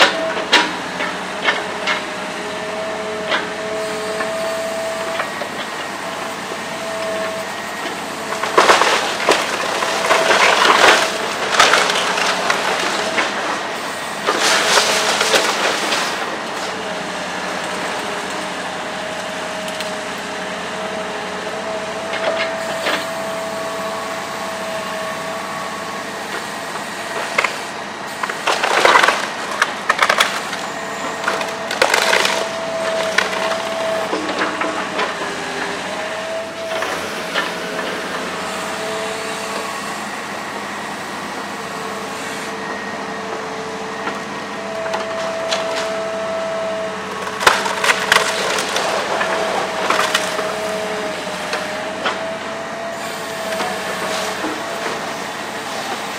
A field recording at a demolition site on the NE corner of Pacific & Bloor in Toronto's High Park neighbourhood. The Daniels Corporation is erecting a controversial 14-storey condo building on the site. The recording captures the crunches of the demolition equipment tearing out the roof joists of one of the old buildings.
Roland R05 sound recorder with Sennheiser MKE 400 stereo microphone.
toronto, High-Park, canada, demolition, daniels-group, field-recording